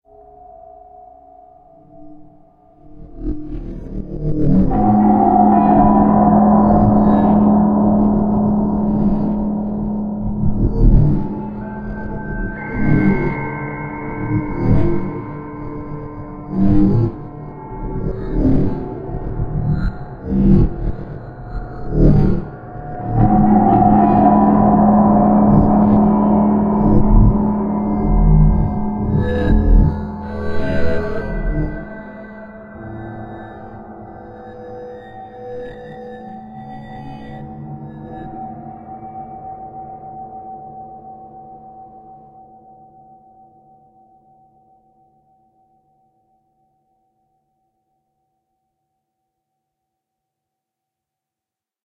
ambient sounds 005
It's been a while since I uploaded, let alone made something... enjoy the free creepiness.
Fully made with a 7-string electric guitar, a Line 6 Pod x3, lots of sampling and VST effects
alien, ambience, ambient, background, creepy, dark, drone, effect, fear, film, filter, fx, game, guitar, guitareffects, horror, illbient, lovecraftian, monstrous, movie, scary, soundesign, soundtrack, spooky, suspense, terrifying, terror, texture, unearthly